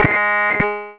PPG 021 Fretless LeadSynth G#3
The sample is a part of the "PPG MULTISAMPLE 021 Fretless LeadSynth"
sample pack. It is a sound similar to a guitar sound, with some
simulated fretnoise at the start. Usable as bass of lead sound. In the
sample pack there are 16 samples evenly spread across 5 octaves (C1
till C6). The note in the sample name (C, E or G#) does indicate the
pitch of the sound but the key on my keyboard. The sound was created on
the Waldorf PPG VSTi. After that normalising and fades where applied within Cubase SX & Wavelab.
bass, lead, multisample, ppg